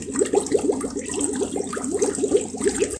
Sound of bubbles created by blowing through a straw into a bucket of water.